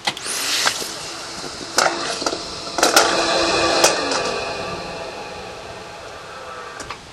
Tube at the bank drive through recorded with DS-40.
air, field-recording, pneumatic, tube